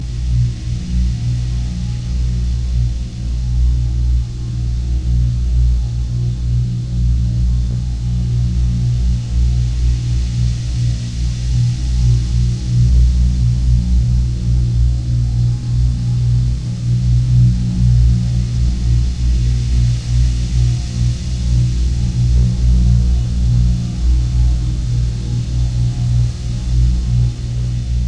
energy holosphere loop
science-fiction fantasy film designed
designed, fantasy, film, science-fiction